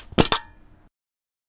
What does it mean to approach non-musical objects musically? How does this approach change our conception of just what exactly can count as 'music'?
Canteen Pluck #1 is an exercise in approaching the built environment in new ways. It was recorded with a Tascam dr100
field-recording, sample-pack, drum-kits